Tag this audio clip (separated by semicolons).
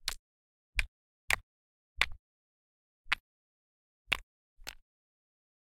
stones; CZ; Pansk; Czech; Panska